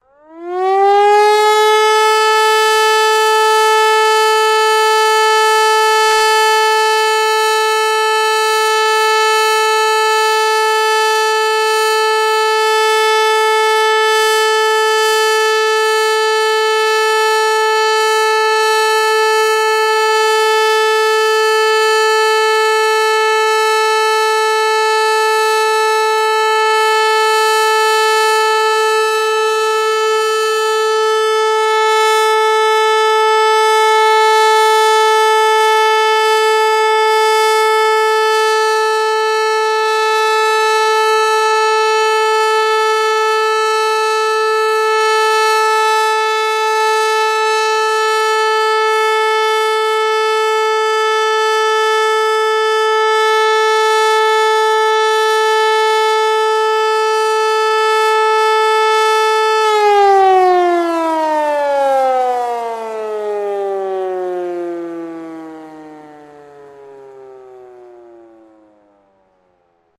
HLS Entwarnung Köln-Niehl
Unbearbeitete und nicht übersteuerte Aufnahme einer HLS273 Sirene in Köln bei der großen Sirenenprobe im Oktober 2015. Signal: Warnung.
Unedited Recording of a german electro/mechanical/pneumatical-siren (type HLS273, manuf. by company Hörmann) at the big siren-testing in the Cologne area, in october 2015. Signal: all-clear.
raid, defense, sirene, signal, all-clear, emergency, allclear, siren, warning, disaster, mechanical, alarm, civil